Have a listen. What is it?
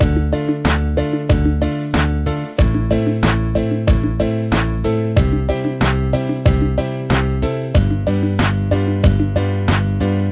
Loop Nothing Can Stop Progress 09
A music loop to be used in fast paced games with tons of action for creating an adrenaline rush and somewhat adaptive musical experience.
videogames, gamedeveloping, loop, music, indiegamedev, war, gamedev, Video-Game, battle, game, victory, music-loop, gaming, games, indiedev, videogame